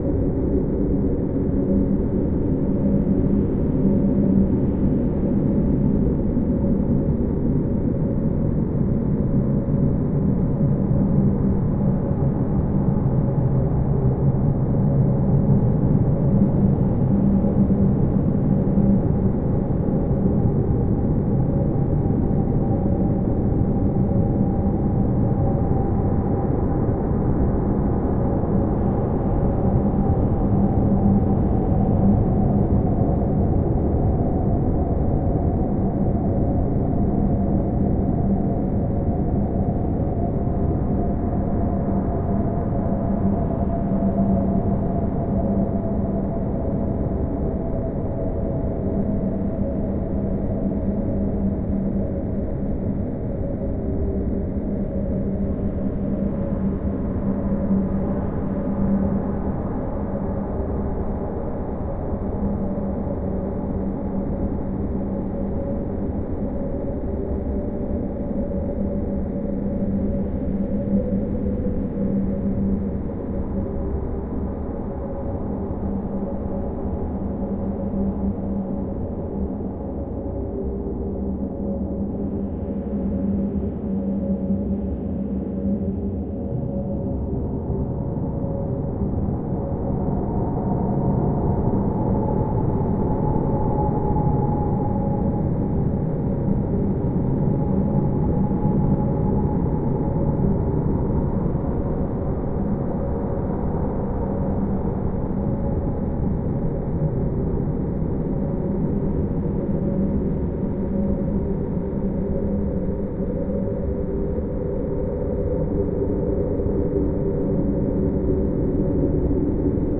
Post-Apocalyptic Ambience
Dark ambience for dark games, films and moods.
This is a mix in Audacity of these tracks:
I recommend you credit the original authors as well.